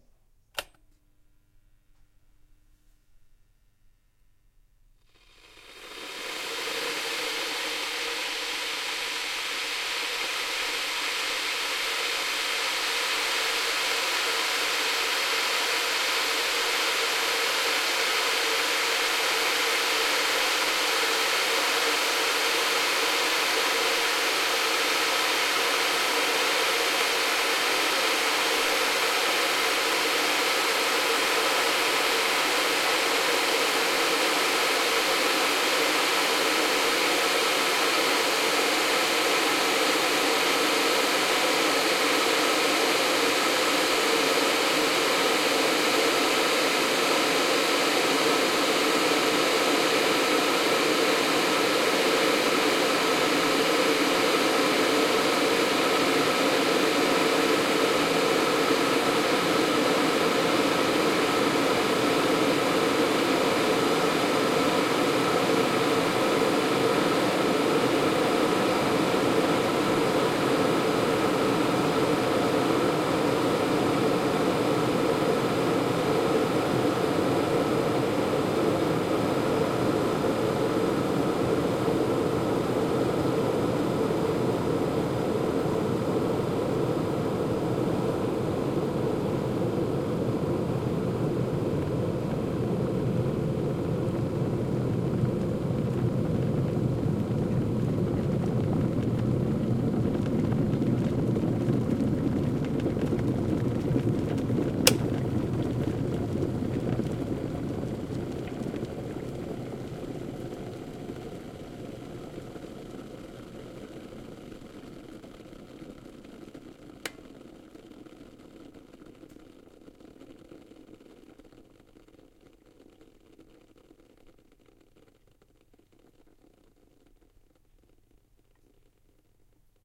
Electric Kettle
This is a recording of my kettle as It Boils water. The sounds included are the clicks of the kettle as I turn it on and it turns off as the water is boiled. Initially during the boil mainly the kettle can be heard, as the boil progresses the water boiling becomes audible.
Recorded using the XYH-6 Microphone on my ZOOM H6 aimed at the base of my kettle.
Clip Gain was used during post.